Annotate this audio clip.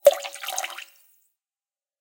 Water being poured into a dry pyrex bowl. Zoom h6.
It sounds like weeing actually. Tell me what you use it for.
Composer and Sound Designer.